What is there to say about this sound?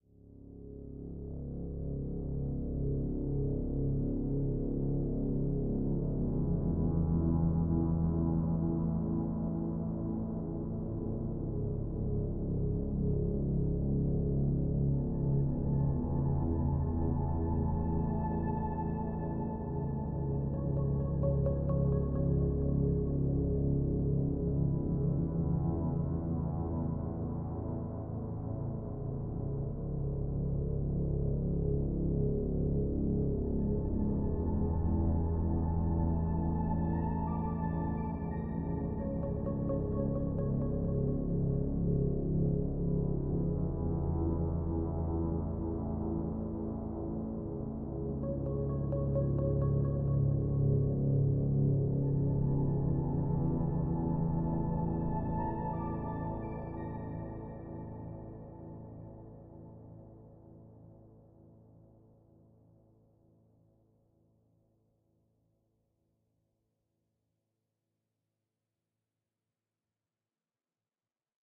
A piece that could be used as a paused video game screen
game, Movie, Sound, SFX, Dark, Design, Film, Video, Magic, Ambient, Free, Cinematic, Atmosphere, Background, Recording, Sound-Effects